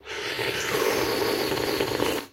Drinking Noisily the Broth
Drinking Noisily the Chicken Broth!
broth, consomme, drink, drinking, eat, eating, noise, noisily, soup, warm